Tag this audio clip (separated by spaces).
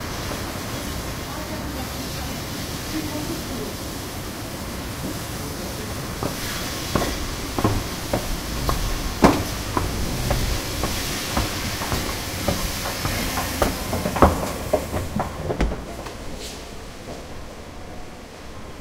berlin car cars conveyor device escalator metro moving s-bahn staircase subway transport transportation urban